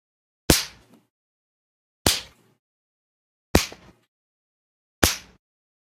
CLARKS smacky-punch ol timey hits
A series of similar sounding smacky hits, EQd in an olde fashioned way
thud, punch, hit, smack, impact